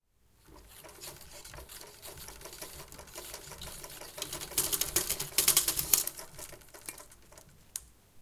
Super 8 mm manual crank film
Sound recording of a real super8 mm projector starting, turning it manually
8mm, cinema, crank, film, hand, manual, movie, project, projecting, reel, rhythm, s8, silent-film, super8, vintage